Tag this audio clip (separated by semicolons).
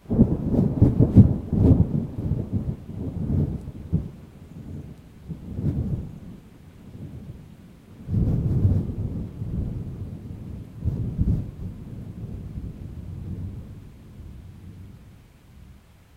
field-recording; north-america; storm; thunder; thunder-clap; weather